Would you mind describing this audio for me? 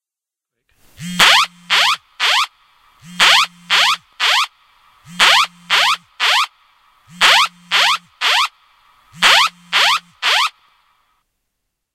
This is the earthquake warning (called "Area Mail") chime on a Japanese phone.
Recorded on SONY UX-80. Built-in stereo mics. Leading and trailing silence forced. Audacity.